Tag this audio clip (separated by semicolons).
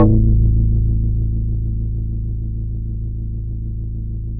Happy-Hardcore; House; Multisampled; Organ; Vibes